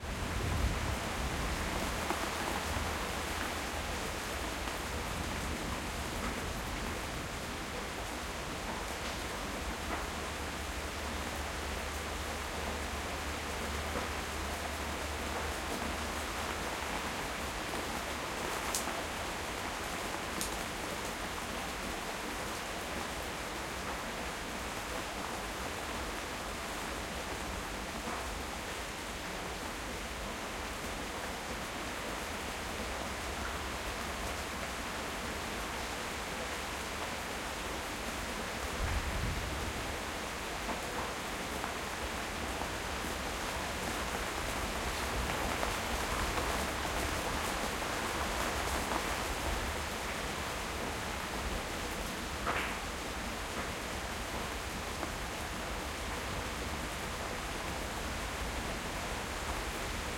Rain Fading storm in a Yard
Heavy storm with thunderclaps that fades. This is the stereo version of a DMS recording
weather, Yard, thunderstorm, rain, lightning, storm, thunder, field-recording, Fading